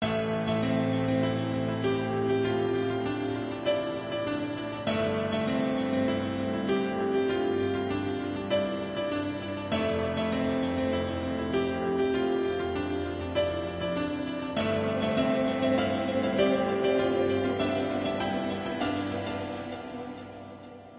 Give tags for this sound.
cinematic Piano sad

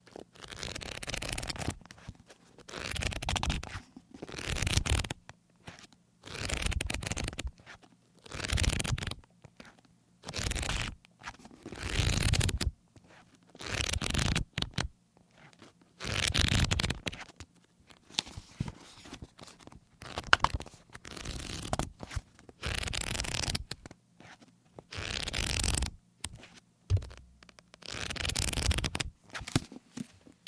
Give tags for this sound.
detail handling interior

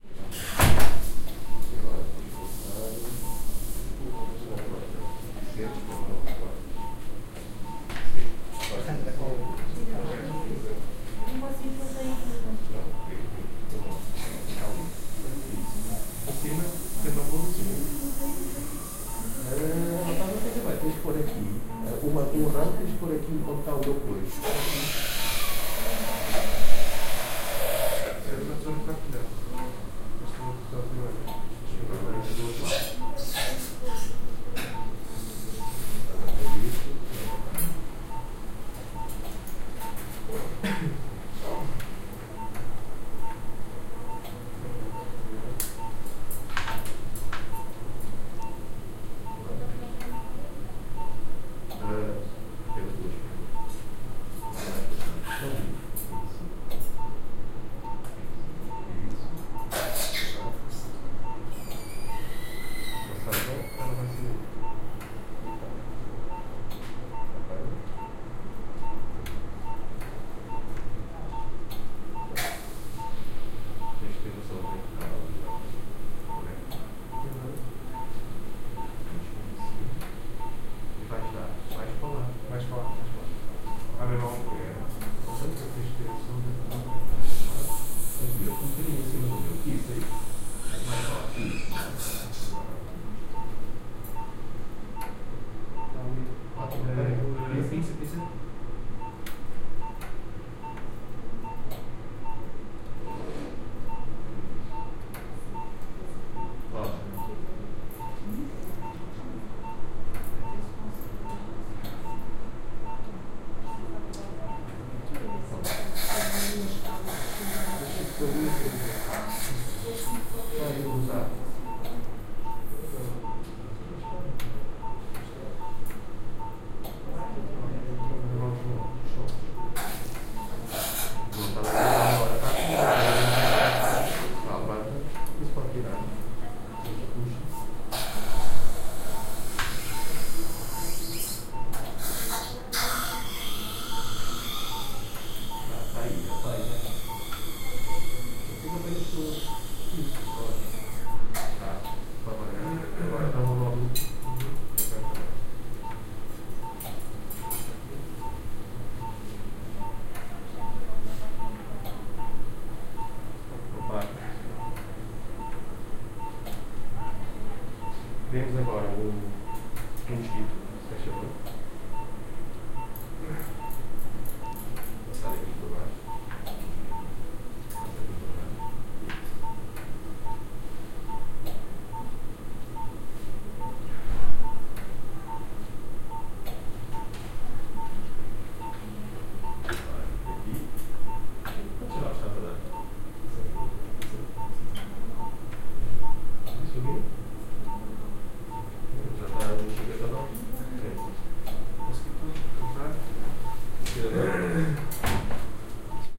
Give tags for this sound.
binaural-recording doctor hospital medicin operation portugal real

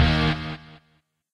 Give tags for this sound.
distorted echo guitar short

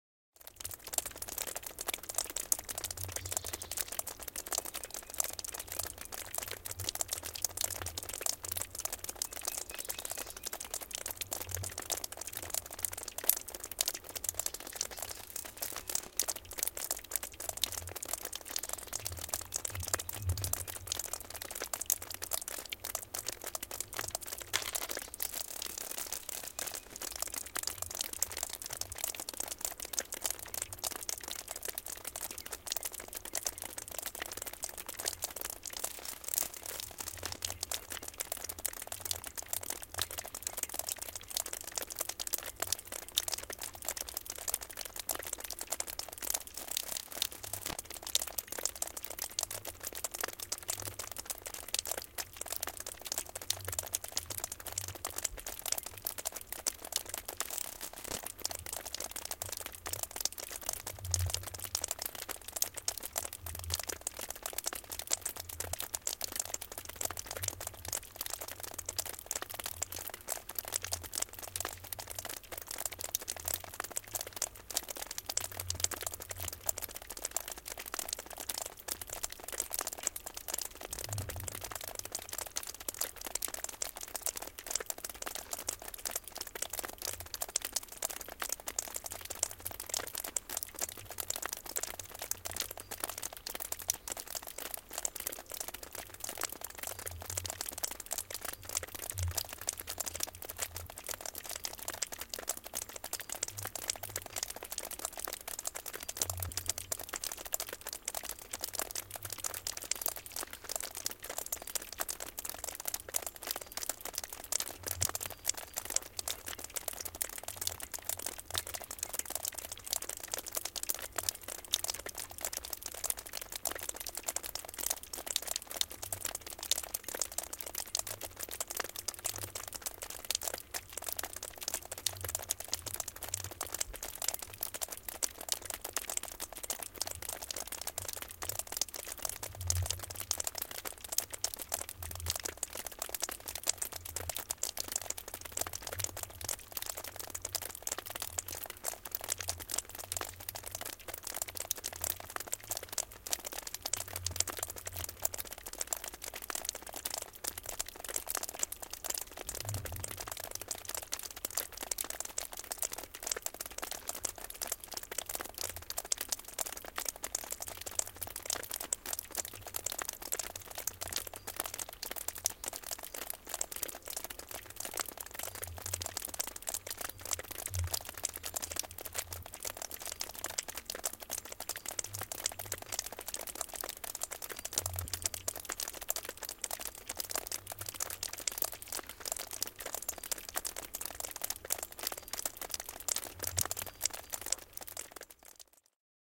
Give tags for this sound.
Pisarat Eaves Ice

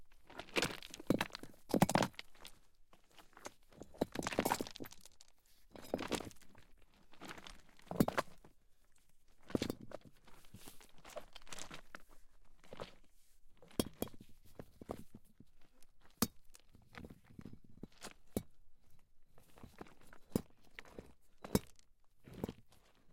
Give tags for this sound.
rattling rocks stone

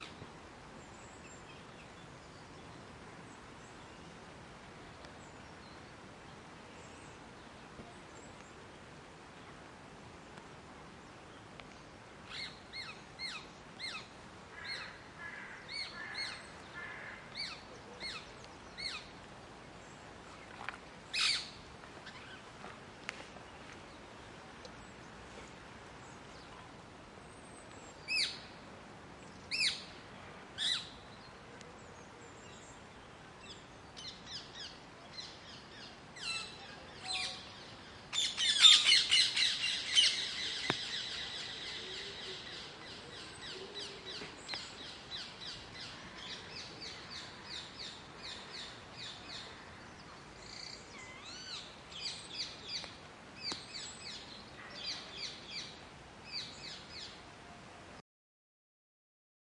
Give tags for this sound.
ambient birds soundscape rain

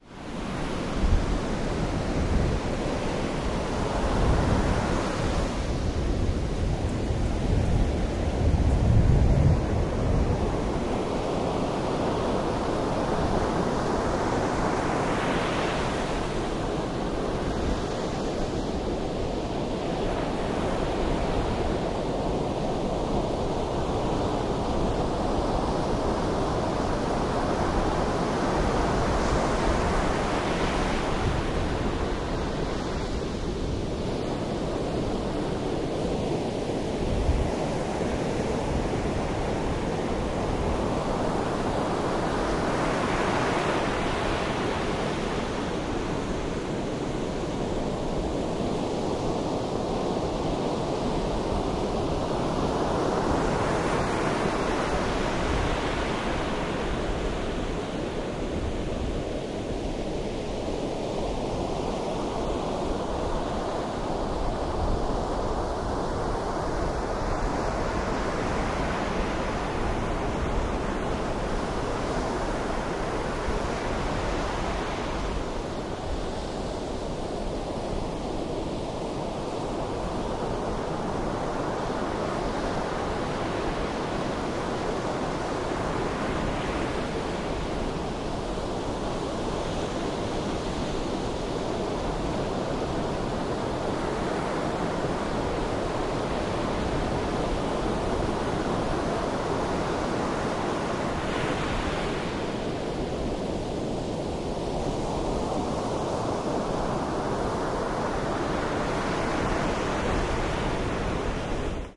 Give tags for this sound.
ambience beach ocean exterior sea binaural shore

